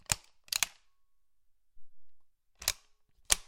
lee enfield open+close breech slow
recording of a lee-enfield rifle opening and closing breech
rifle, lee-enfield, reload, foley